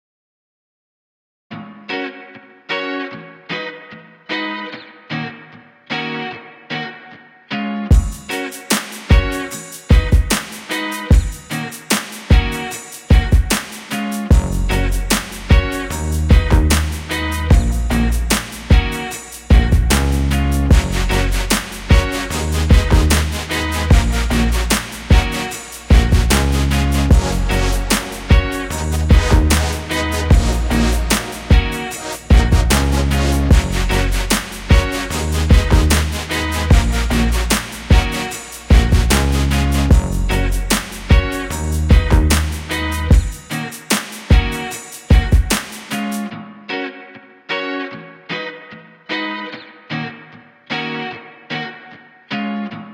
Guitar with beat 5 was made on the app groovepad.
It would be awesome if you could tell me if you use this sound for anything (you don't have to of course). :D
beat, cool, drum-loop, drums, groovy, guitar, loop, rhythm